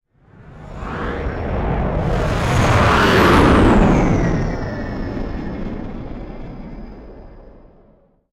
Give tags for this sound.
airplane; scifi; aircraft; plane; fly-by; pass-by; sci-fi; flyby; pass; passby; whoosh; engine; ufo; woosh; jet; passing; vehicle

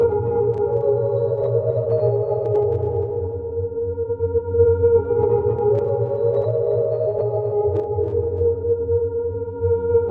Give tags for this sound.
down; piano; sound-design

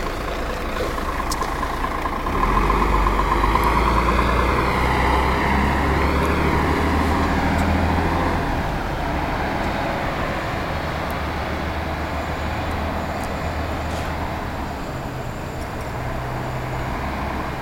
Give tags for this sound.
traffic; city; bus; car; field-recording; outdoor

